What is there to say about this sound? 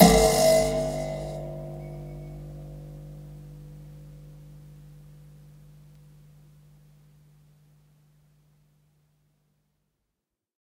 This sample pack contains eleven samples of the springs on an anglepoise desk lamp. I discovered quite by accident that the springs produced a most intriguing tone so off to the studio I went to see if they could be put to good use. The source was captured with two Josephson C42s, one aimed into the bell-shaped metal lampshade and the other one about 2cm from the spring, where I was plucking it with my fingernail. Preamp was NPNG directly into Pro Tools with final edits performed in Cool Edit Pro. There is some noise because of the extremely high gain required to accurately capture this source. What was even stranger was that I discovered my lamp is tuned almost perfectly to G! :-) Recorded at Pulsworks Audio Arts by Reid Andreae.
tension, helical, spring, desk, key-of-g, josephson, metal, boing, lamp, twang, c42, anglepoise, electric, npng